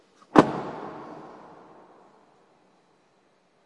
20150712 car.door.slam.03
Car door slam in an underground, almost empty parking. Shure WL183 into Fel preamp, PCM M10 recorder